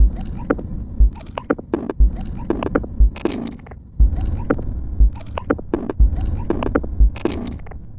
Ambient(ish) 120BPM
A friend Freesounder launched a dare asking people to make drum loops using samples from one of his packs:
The original samples were very short and I was more in the mood for making ambient-ish like sounds so, whith his permission, I processed the original sounds to create long sounds more suited to my purposes.
This loop contains several layers. Slight bitrate reduction used on one of the layers.
In this loop I have used several modified versions of each of the following sounds:
For more details on how the modified sounds were created see pack description.
120-bpm, 120bpm, ambient, beat, Dare-37, drum-loop, loop, percussion-loop, rhythm, rhythmic